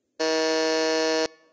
I made this sound by shortening the doorbell buzzer sound from this site. It fits into a game show setting better now. The loudbuzzer sound might be better than this one depending on the situation it is to be used for.
buzzer, wrong-answer, buzz, game-show